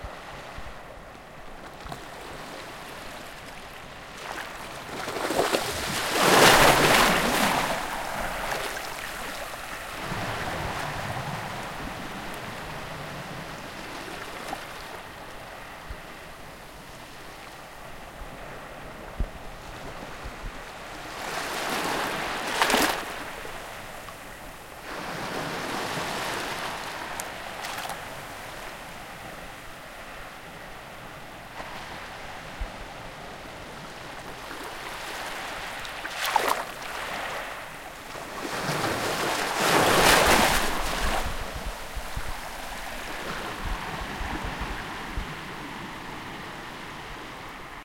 Waves on Beach 2
Waves on a beach
waves, water, beach, ocean